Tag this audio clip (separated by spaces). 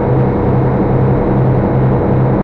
engine genova ferry noise loop field-recording